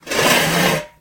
The sound of a metal folding chair being dragged across a concrete floor. It may make a good base or sweetener for a monster roar as well.